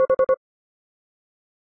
4 beeps. Model 2
beep, futuristic, gui